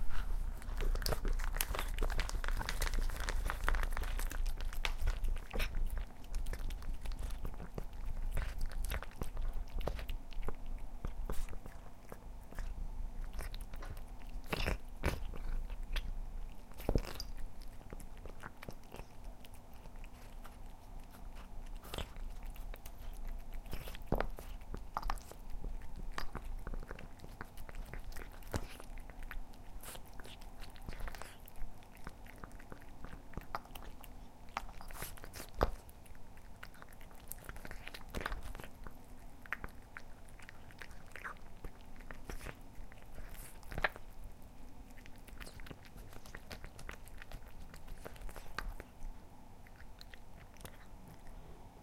Very greedy cat
My female cat, 1 year 9 months old. Can be very greedy with food and very noisy eating - specially in the morning.
Approx 55s clip o fher eating wet food, to fulfill a forum request:
REcorded with built in mics on a Zoom H1 with windshield fitted. Recorder placed on the kitchen floor, 20cm from the cat, aimed at her bowl.
food, wet-food, starved, sound-request, sample-request, starving, eat, pet, cat, greedy